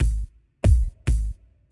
140 break beat simple loop
140 bpm simple break beat